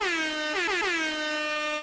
Multiple airhorns sounding off in a row. Cut and edited from the original sound posted by jacksonacademy
loud, short